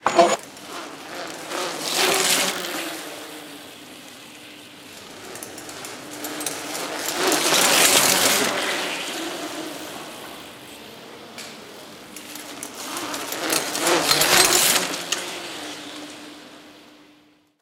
BMX, Indoor, Pass By, Mono, Variation B, Crackling Bike
This pack is rather LQ, background noise and other mistakes are present.
Get brand new, high resolution BMX sounds here:
Gear used: analog tape recorder Nagra IV + Sennheiser ME 66 microphone.
Recorded for the Projection student movie, 2014, Zlin, CZ.
bicycle, bike, bmx, extreme, ride, riding, sport